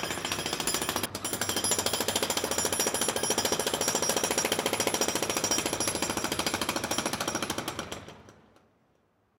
Sound FX - Jackhammer
Mono jackhammer. Close. Natural end. Recorded in the Upper West Side of NY City with a Sennheiser 416.
close, natural-end, field-recording, jackhammer, environmental-sounds-research